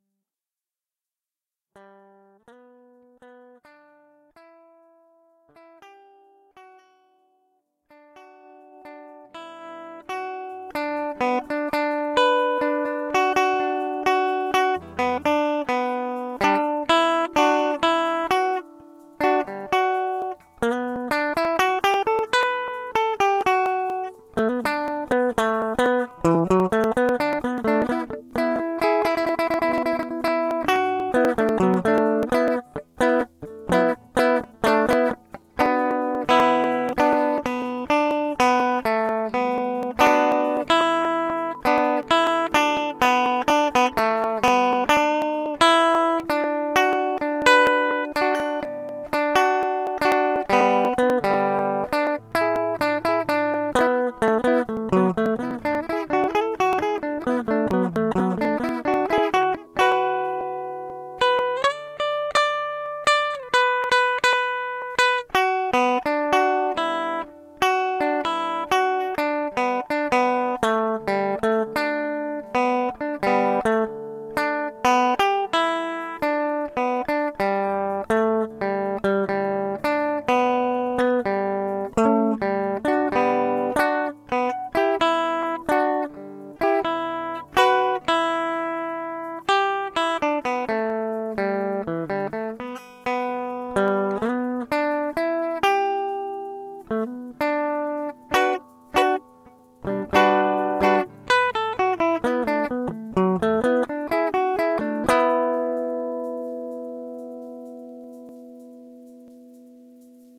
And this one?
My dad playing the guitar.
A while back, Yoshitoshi was having a remix contest for Sultan featuring Zara Taylor - "No Why", and for my submission I wanted to try adding a live interpretation/cover of the guitar loop.
So I asked my dad but he got way too carried away in all kinds of directions I didn't want to take.
But this might be useful to someone.
folk, guitar, session